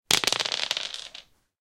Dés jetés (pan) 04
dice noise - alea jacta est